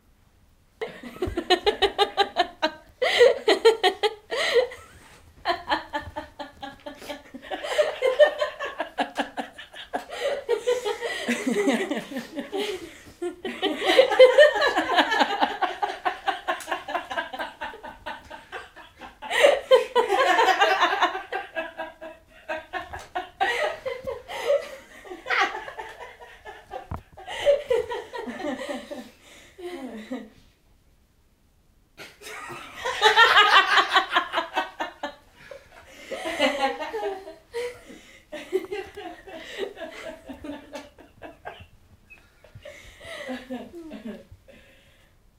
Actors laughing for a theatrical soundscape recorded in a quiet room on a Tascam field recorder. April 2007